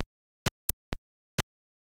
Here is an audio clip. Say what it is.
microkit.2-example
nifty, microkit, shorter